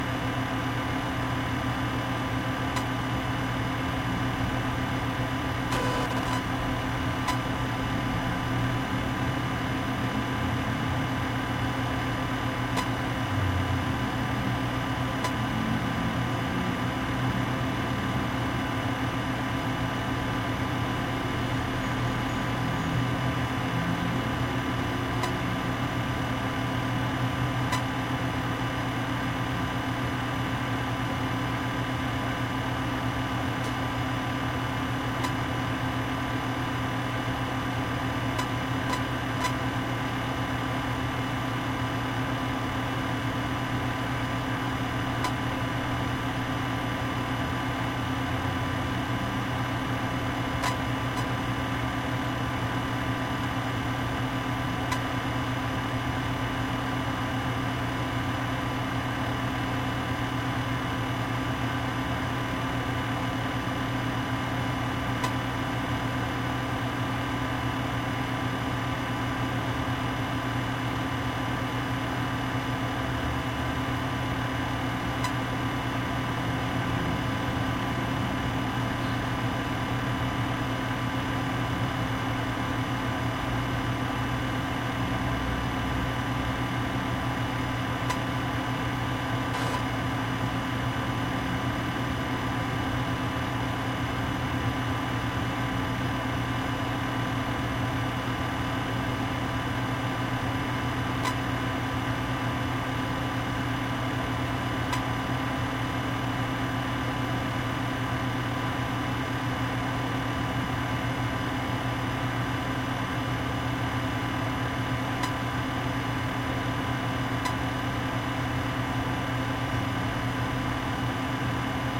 001 - CPU Fan 1.L
This is the noise of my PC AMD FX6300 in normal work load.
air-conditioning, ventilator, computer, fan, CPU, noise, ventilation